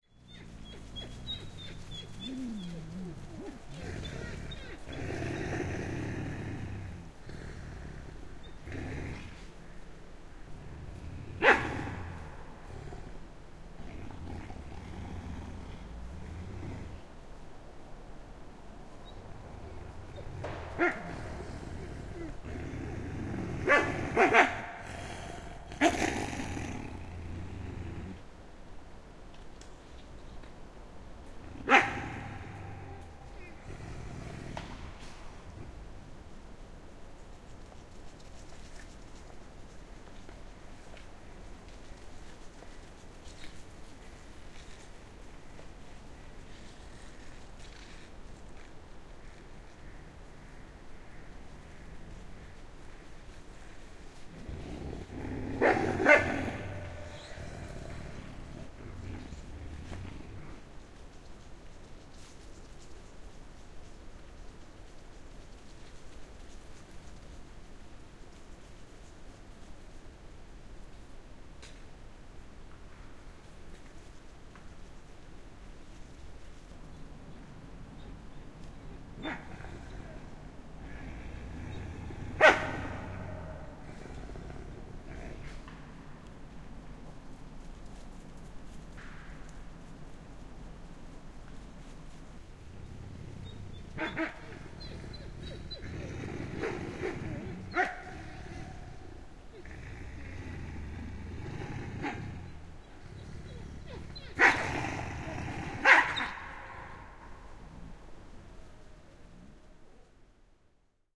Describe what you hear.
Susilauma, sudet vikisevät ja ärhentelevät / A pack of wolves, squeaking and yapping further away
Pieni susilauma. Etäistä, kaikuvaa haukkua ja ärhentelyä, vikinää.
Paikka/Place: Suomi / Finland / Ähtäri
Aika/Date: 21.04. 1994
Susi, Wildlife, Field-Recording, Yle, Wolf, Tehosteet, Yleisradio, Suomi, Wild-Animals, Finnish-Broadcasting-Company, Finland, Soundfx, Animals